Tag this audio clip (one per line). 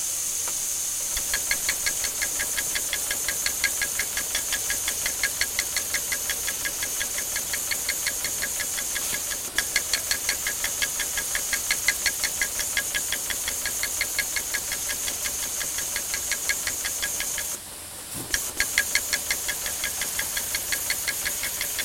packaging
microchip